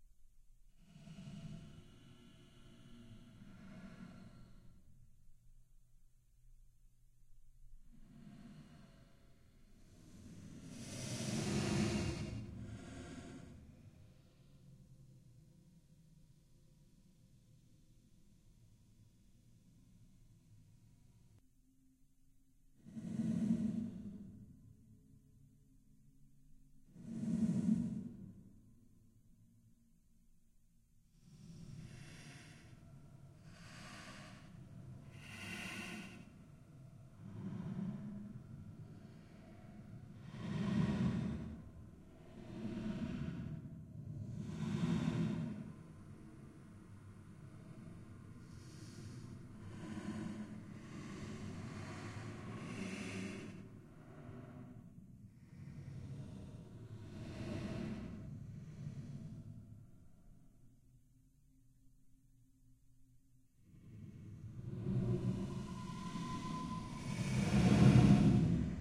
Originally a recording of a desk typewriter streched and moderated in Adobe soundbooth.
Recorded with Korg888 and TK-600 microfone.
Sounds scarey doesn´t it!
Dark, Halloween